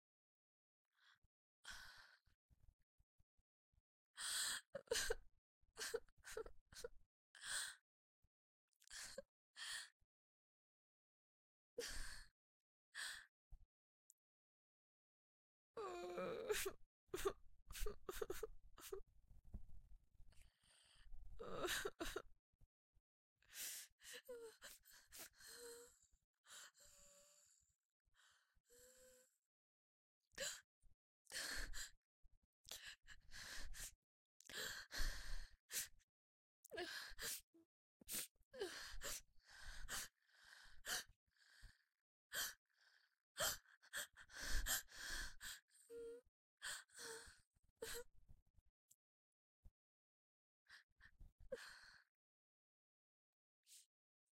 Woman's harmonics - Crying
A series of woman's crying that ranges from light to just heard of the death of a loved one.
cinematic, crying, emotional, acting, hurt, scared, women, upset, woman, wake, female, sadness, girl, wistful, tears, sad, melancholic, worried, harmonics, OWI, voice, s